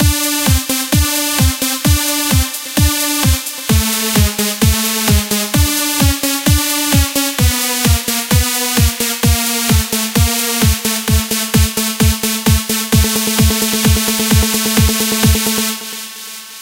Titan Fall Music
Titan Trance Edm Beat